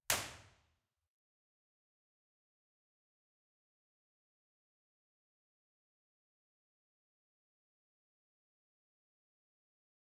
ESMUC Choir Hall IR at Rear Right-Corner
Impulse Response recorded at the Choir Hall from ESMUC, Barcelona at the Rear Right-Corner source position. This file is part of a collection of IR captured from the same mic placement but with the source at different points of the stage. This allows simulating true stereo panning by placing instruments on the stage by convolution instead of simply level differences.
The recording is in MS Stereo, with a omnidirectional and a figure-of-eight C414 microphones.
The channel number 1 is the Side and the number 2 is the Mid.
To perform the convolution, an LR decomposition is needed:
L = channel 2 + channel 1
R = channel 2 - channel 1
reverb, esmuc, ir, impulse-response